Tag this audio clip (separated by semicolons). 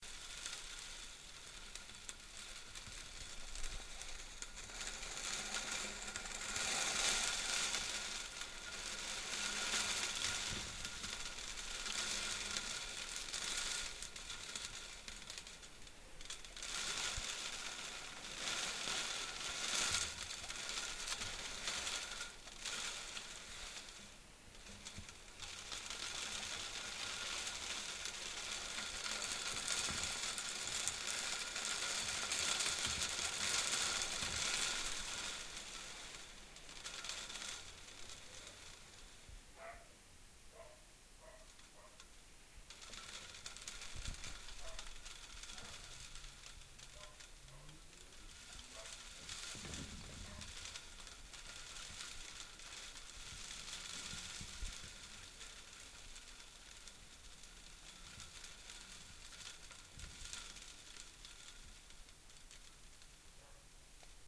ambiance; atmo; atmos; atmosphere; background; background-sound; rain